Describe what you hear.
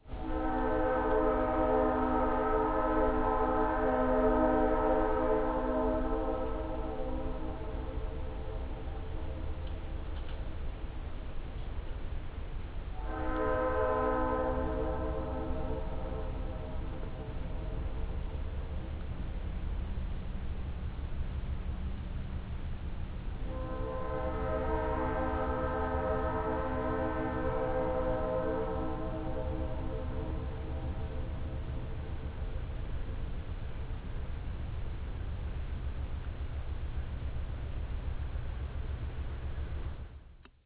distant train01
Multiple train whistles in distance with lovely ambient echo. Recorded with Griffin iTalk mic and iPod.
field-recording, lofi, whistle, train, distant, echo